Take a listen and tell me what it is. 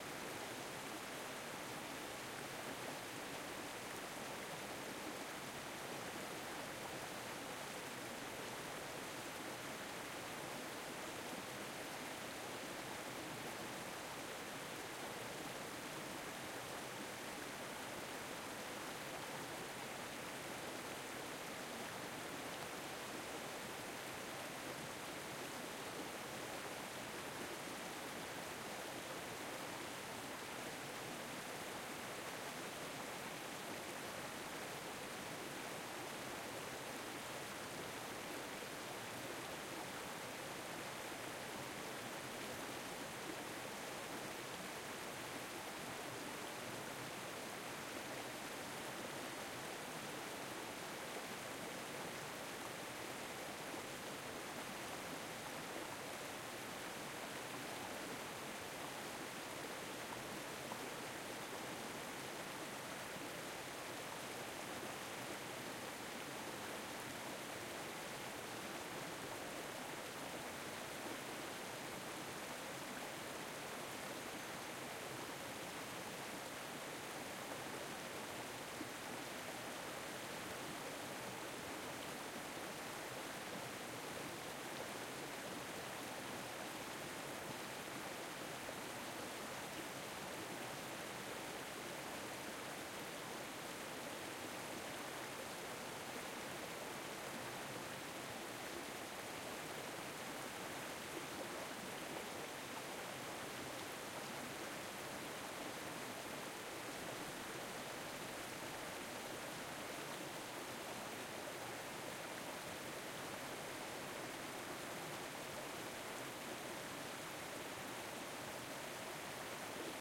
Larrun Riviere close distance
Rivière près de La Rhune, pays basque.
Distance moyenne.
River next to Larun, basque country. Med. distance
Sound Devices 633/Schoeps MSTC64 ORTF